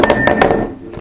puodel ritmas
tea mug spinning
mug, spinning, tea